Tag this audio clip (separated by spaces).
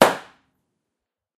bag impact shot burst bang plastic explode